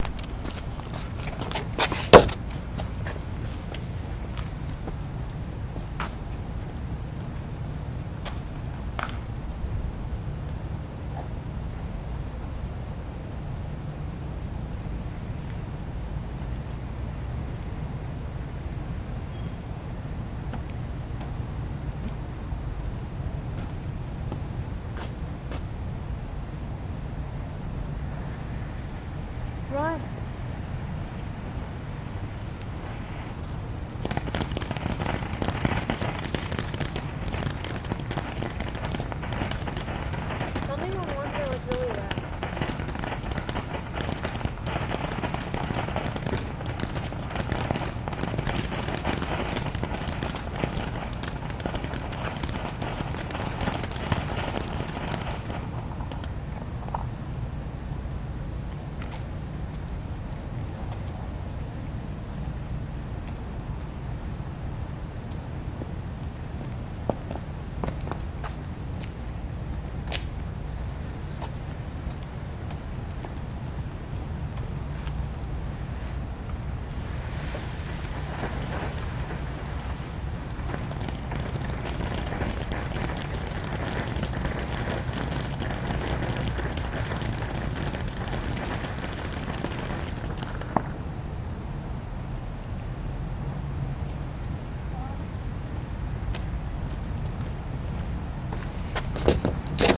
I think these are the recordings I remember making in Vero Beach FL. I kept hearing gun shots coming from the west and assume they were wind blown sounds of outdoor gun range somewhere near there. Then the landscapers started with the lawnmowers.